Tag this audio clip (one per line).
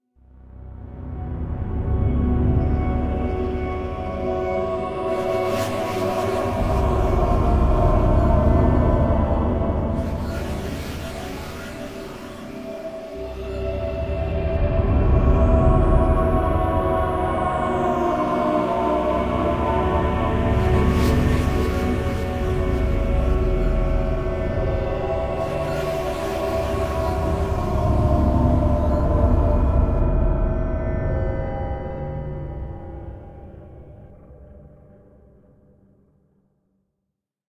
Alien
Ambient
artifact
Dark
Game-Creation
Horror
Scary
SF
Soundcluster